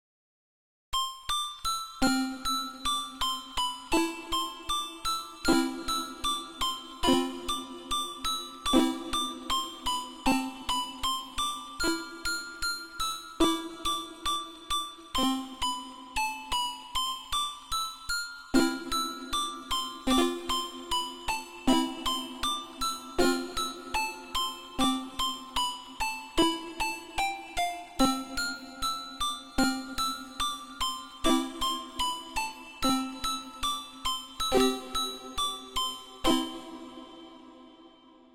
Kawaii Music Box
A cute and creepy music box hiding on the attic.